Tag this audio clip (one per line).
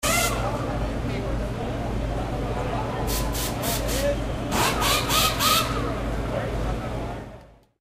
mic-ecm907
noise
brazil
car
wheel-wrench
field-recording
race
interlagos-circuit
sound
md-mzr50
formula1